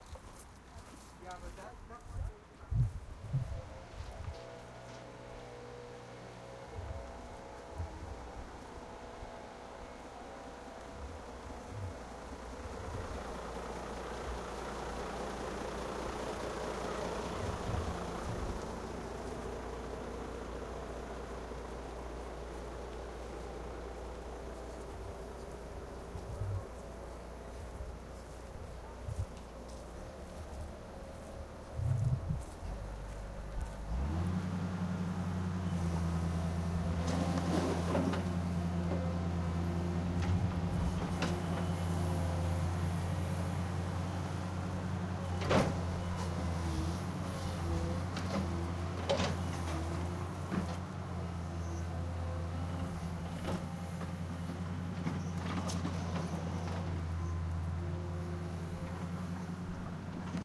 mySound GWAEtoyIS GeniusHour construction3
Genius Hour and radio club students from GEMS World Academy Etoy IS, went exploring a construction. And not just any construction... the new sport centre.
sport, construction, recording